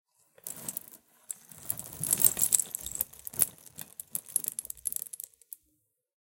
Jewelry moving sounds
creeks, jewelry, soft